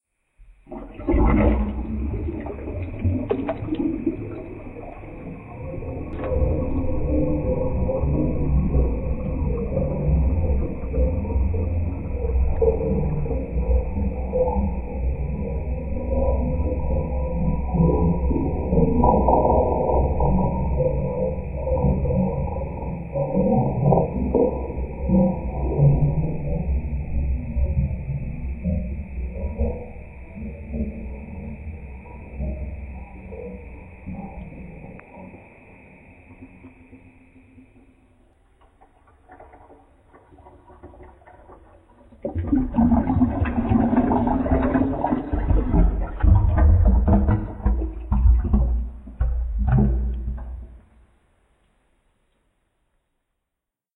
Under Water (Water SFX remixed)
I took a piece of the original recording and used a wet pipe reverb on Audacity to make it sound like as if you were underwater. I also took another piece from another part of the recording and slowed it down so that it sounds like a huge drain pipe in a sewer or something. Hope this is useful!
Water, pool, tub, Bubbles